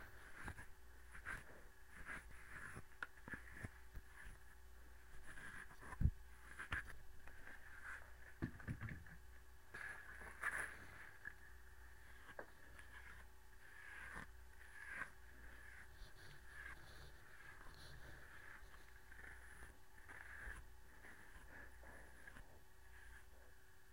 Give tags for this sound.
comb hair head

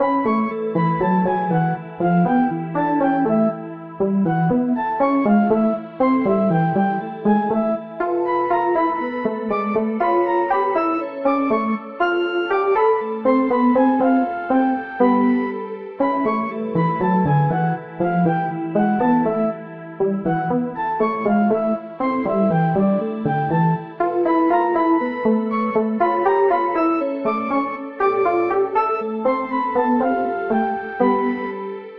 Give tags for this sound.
game background electronic digital loop arcade-game

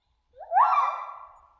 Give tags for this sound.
animal call chirp

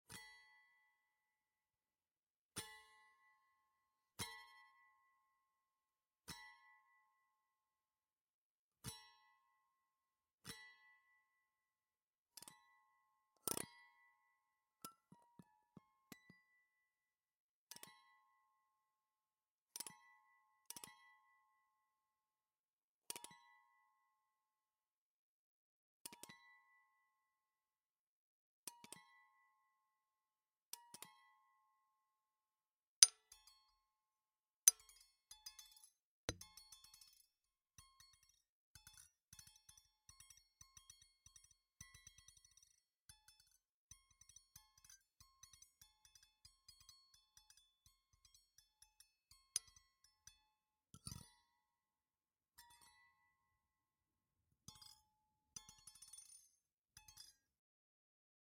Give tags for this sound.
guitar guitar-strings ringing sound-design steel steel-strings strings

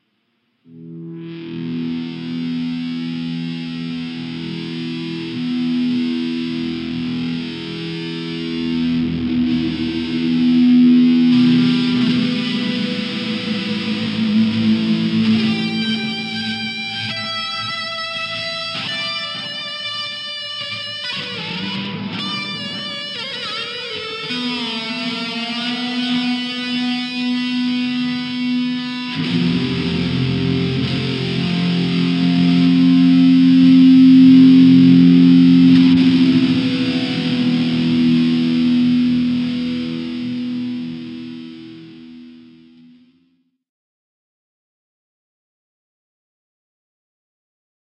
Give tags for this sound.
140 bmp Swell Tremolo Guitar bit 16 Solo Tokai Noise

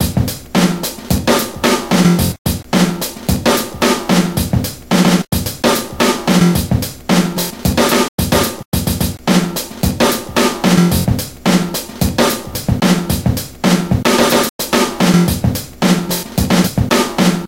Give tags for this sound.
beat beats breakbeat breakbeats drum drumloop drumloops drums groove loop loops

